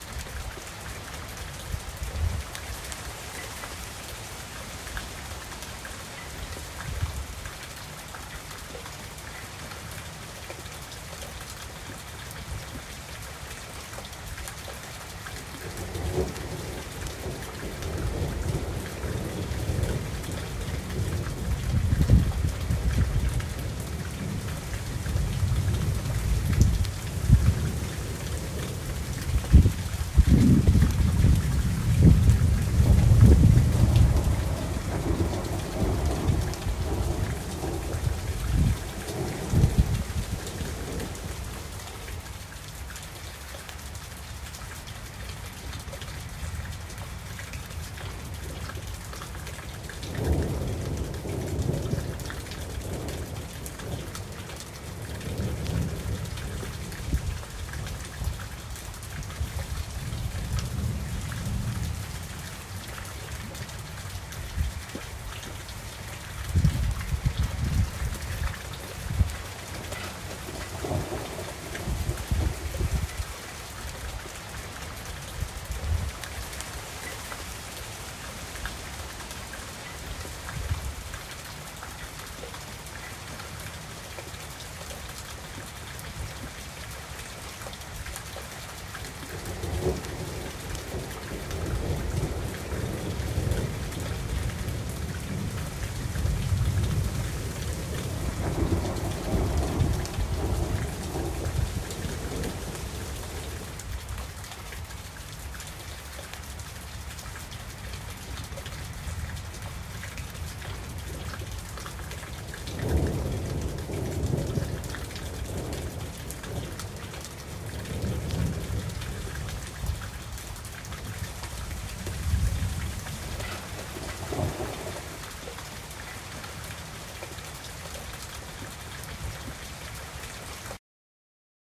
This is an audio track of ambience sound of a rainstorm. This audio contains elements of rain, thunder, a water source of some kind (river) and wind.